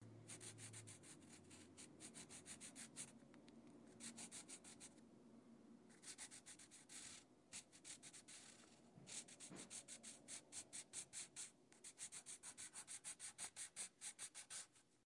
A pencil drawing on paper recorded from 6 inches. Some longer lines, mostly shorter shading
Pencil, Draw, Scratch